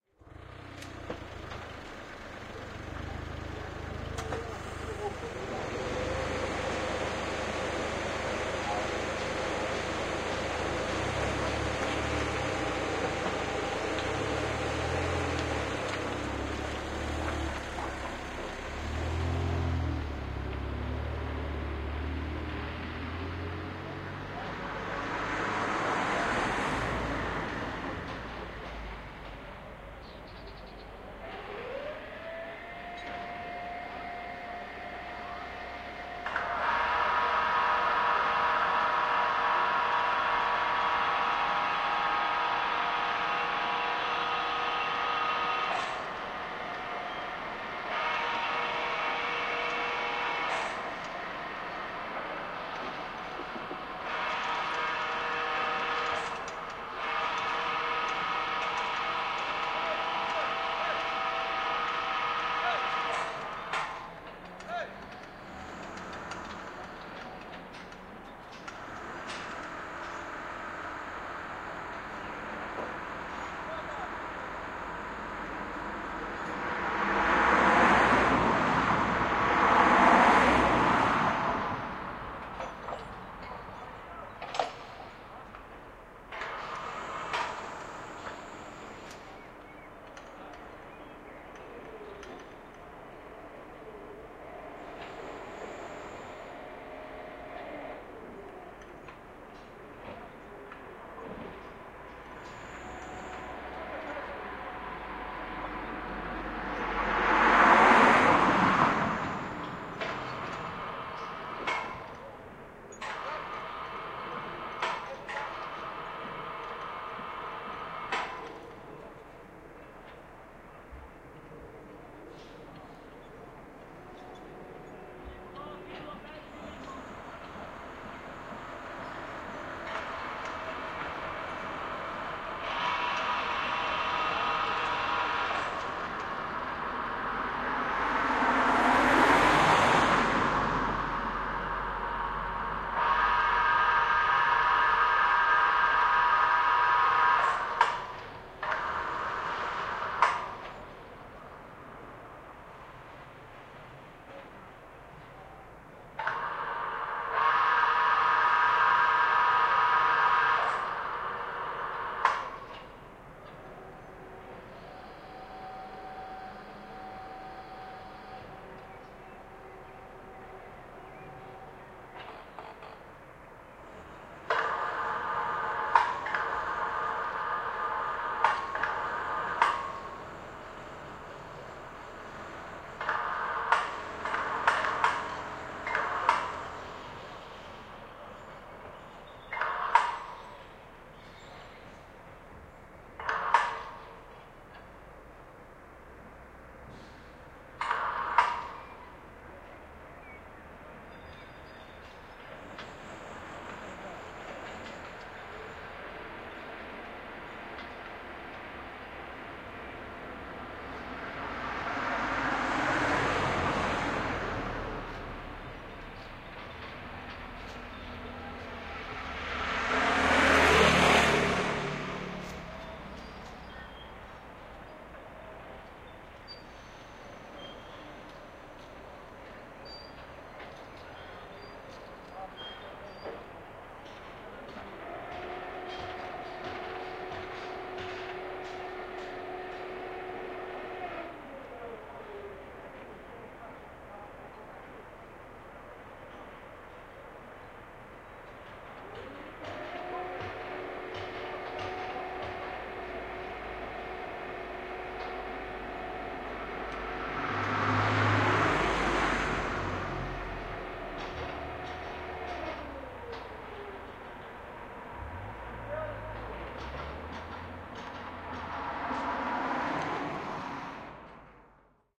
cranes of babin kuk dubrovnik 050516
Ambience of the Cadinala Stepinca street in Lapad district in Dubrovnik. Sounds of working cranes on the Babin Kuk hill (the new hotel construction).